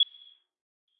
Short beep sound.
Nice for countdowns or clocks.
But it can be used in lots of cases.
beep, beeping, bit, computer, counter, digital, g, hit, menu, select